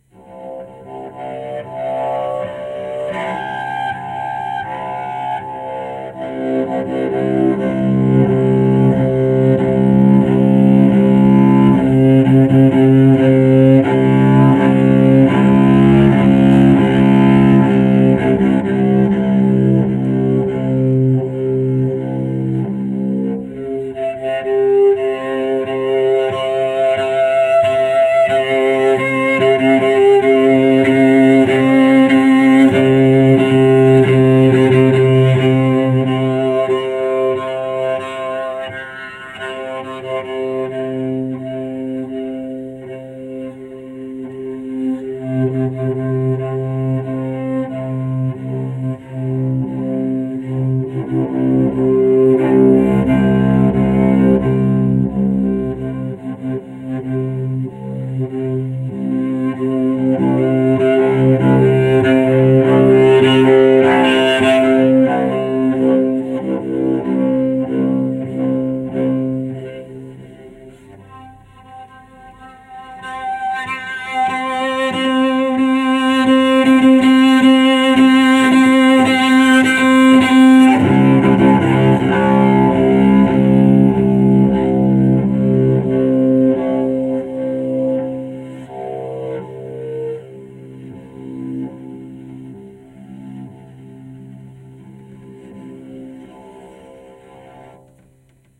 Cello Play C - 05
Recording of a Cello improvising with the note C
Acoustic, Instruments, Cello